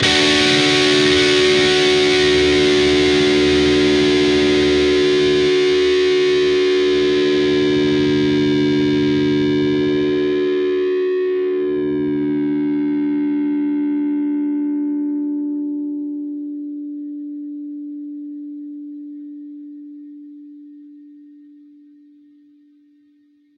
Dist Chr Dmj 2strs 12th up
Fretted 12th fret on the D (4th) string and the 11th fret on the G (3rd) string. Up strum.
distorted-guitar, lead-guitar, distortion, chords, guitar-chords, distorted, lead, guitar